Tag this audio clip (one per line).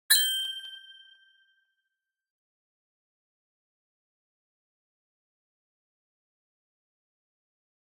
cartoon
cartoony
eye
blink
eyes
short
sound-design
wink
sfx
fx
soundfx
bling